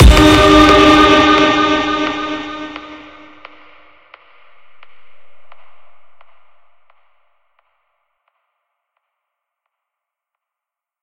Cringe Scare
Made with cymatic hits and violins
Creepy, Horror, Jumpscare, Scary, violin